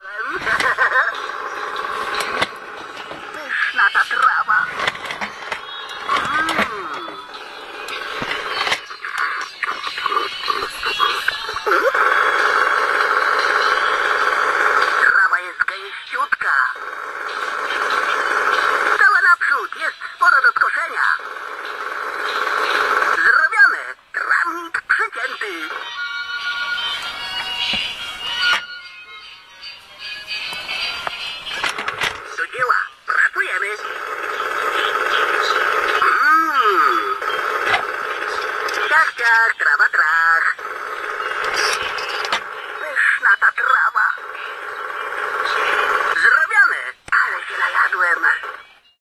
(lawn)mower toy 191210
19.12.2010: about. 20.30. speaking lawn machine toy. Carrefour supermarket in Poznan. Franowo Commercial Center.
field-recording, soundtoy, speaking-toy, supermarket, toy, voice